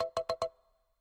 Fix Kalimba
Short noise/ sound for notifications in App Development.
The sound has been designed in Propellerhead's Reason 10.